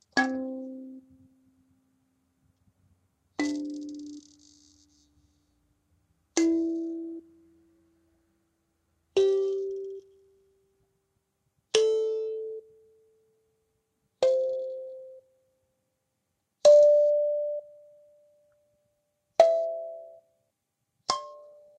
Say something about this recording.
thumb piano scale. pentatonic with metal buzzers.
pentatonic, mbira, african, metal, instrument, kalimba, thumb-piano, tribal